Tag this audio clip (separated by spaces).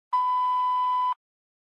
phone telephone mobile busy